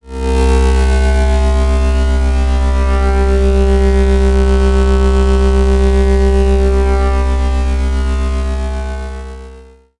Synth lead created using a combination of Serum and Ableton Operator and Granulator 2. Used Serum wave table with a Operator saw patch. Resampled in Ableton Live then further processed in Granulator2 to give it a slight granulated feel.
Key of C
Hard, Lead, Synth, Trance